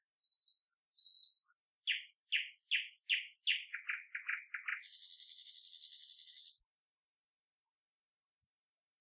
Little bird tweets.
If you enjoyed the sound, please STAR, COMMENT, SPREAD THE WORD!🗣 It really helps!
woods, nightingale, tweet, field-recording, summer, birds, nature, tweeting, bird, singing, song, birdsong, sing, thrush, forest